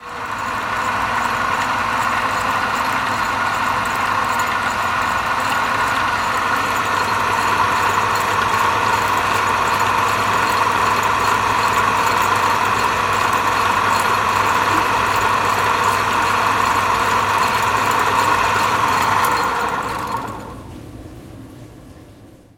Sound of a meat grinder at the kitchen of UPF Communication Campus in Barcelona.
kitchen, meat-grinder, machinery, UPF-CS14, cooking, cafeteria, campus-upf